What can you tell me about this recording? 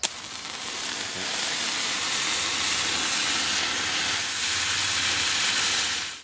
road flare ignite burns